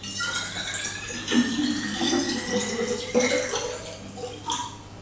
filling water bottle